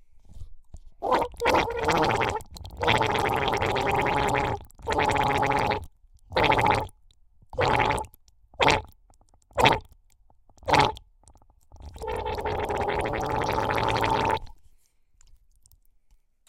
funny duck like bubbing in water
blowing through a large diameter dringking straw into bubble tea variation with cut "flute" mouthpiece